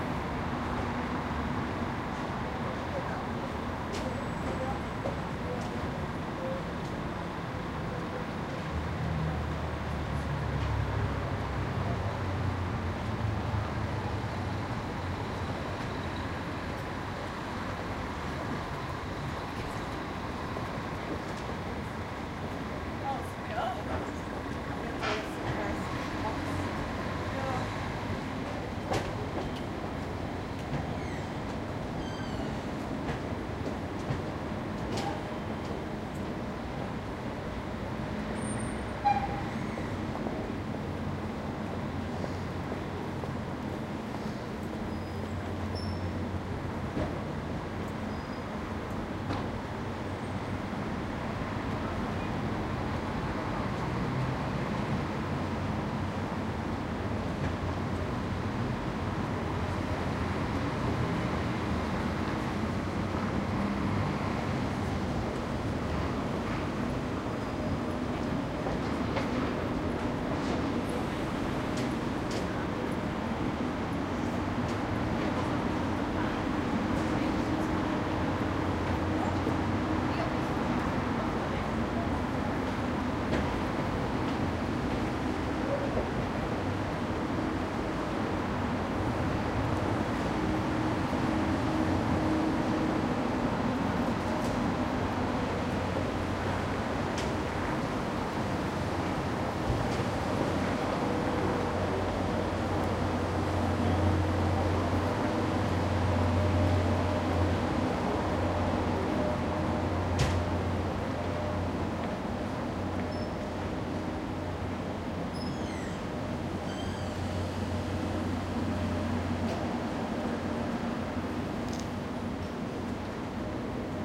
140814 Graz MainStationPlaza F
Surround field recording of the square outside the main railway terminal in Graz/Austria, facing the train station away from the bus terminal. City traffic can be heard in the background, people are walking in the mid and near field.
Recorded with a Zoom H2.
These are the FRONT channels of a 4ch surround recording, mics set to 90° dispersion.
ambiance, open, urban, exterior, city, Europe, public, busy, field-recording, footsteps, Graz, traffic, people